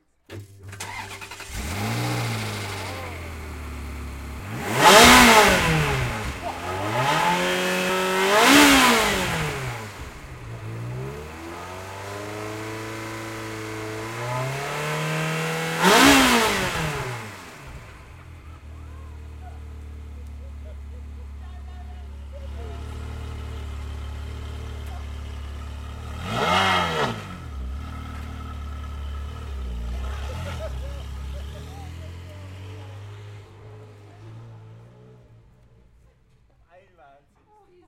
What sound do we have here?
recorded with a Zoom H6 in Stereo directly above the engine of the standing car.